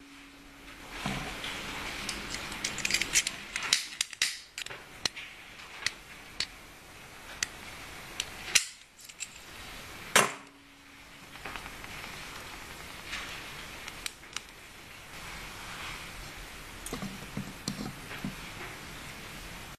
lightening incense 131110
13.11.2010: about 15.00. my office at my place. tenement on Gorna Wilda street in Poznan.
the sound of lightening up of the incense by using lighter.
clicks, home, field-recording, lightening, domestic-sounds, flat, inside, lighter